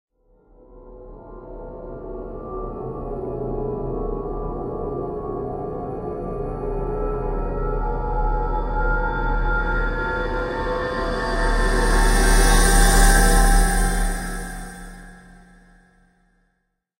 Scary Hits & Risers 003
cluster, movie, sound, fx, freaky, soundeffect